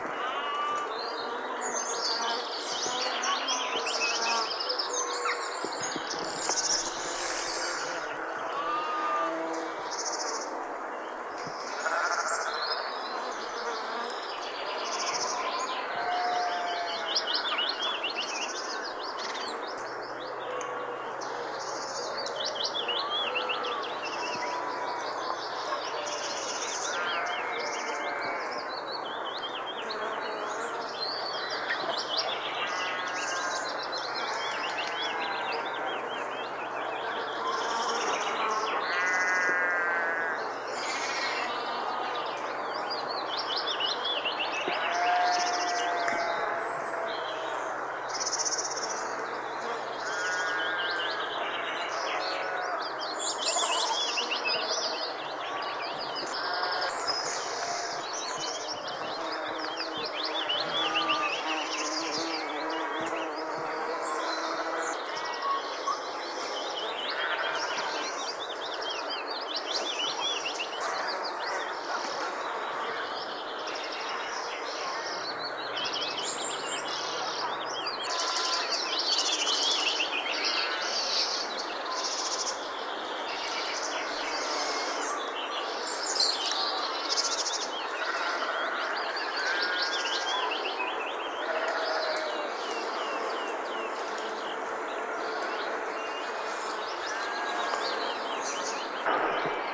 Field recording of British countryside in peak summer time. Recording taken near Allendale Common in Northern England using a Marantz flash recorder and a Rode NT1A microphone. The recording has been edited extensively from the original.